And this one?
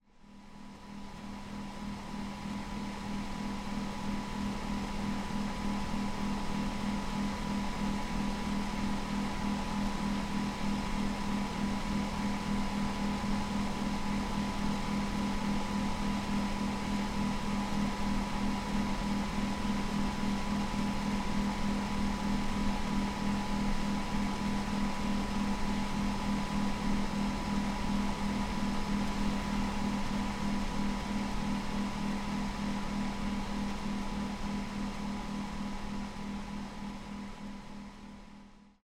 ac blowing
ac machine blowing wind